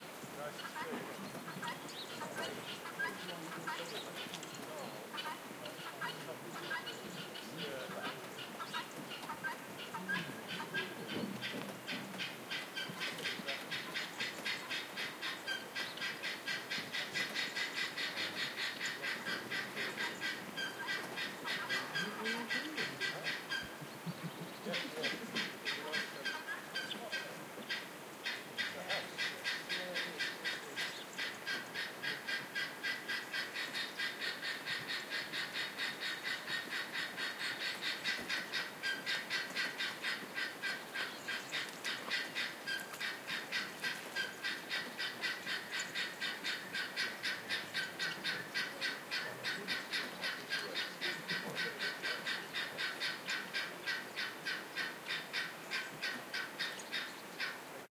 Guinea Fowl Sea and Tweets
Atmosphere, Birds, Countryside, Farm, Outdoors, Tweeting